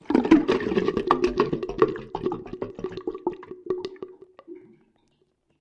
Experiments in sink 2
Experiment 2: A pair of Behringer C2 mics in a plastic bag resting in the plug-hole as the water drains away.
bubble, drain, experiment, glug, gurgle, plug-hole, sink, water, wet